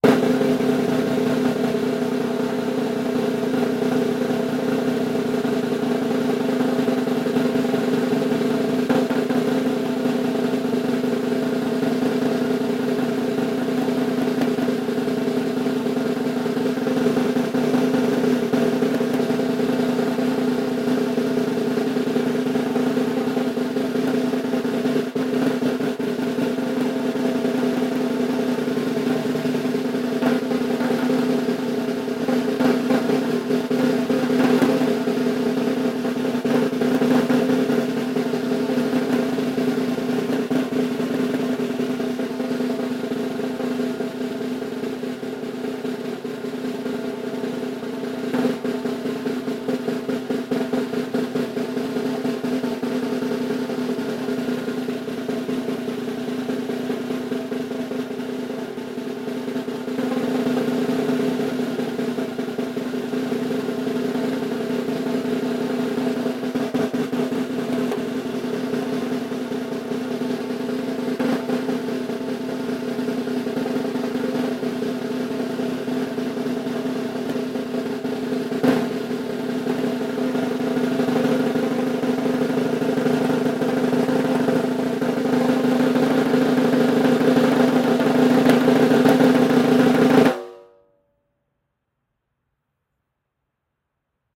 ultra-long-90second-drumroll ceremony
90 second version of a drum roll for request. Extended.
Sound ID is: 569906